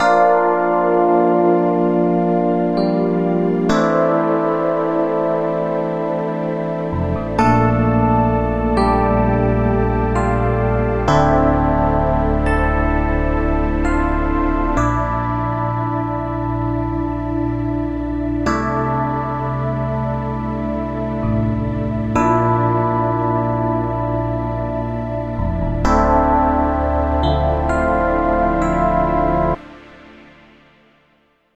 music scoring composed and arranged by me for movies, tv or commercials